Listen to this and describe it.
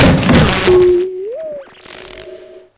Electrified percussion short cataclysm